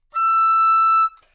Part of the Good-sounds dataset of monophonic instrumental sounds.
instrument::oboe
note::E
octave::6
midi note::76
good-sounds-id::8019